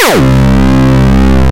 gabba long 007+
distortion, gabba, kick